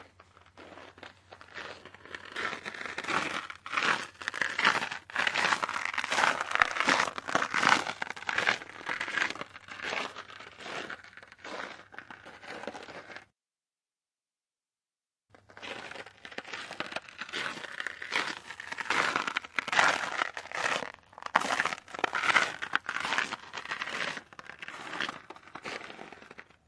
Walking On Frozen Snow LR-RL
A stereo field-recording of footsteps on frozen snow (passing the mic) . Rode NT-4 > FEL battery pre-amp > Zoom H2 line in.
field-recording, footsteps, ice, snow, stationary-mic, stereo, walking, xy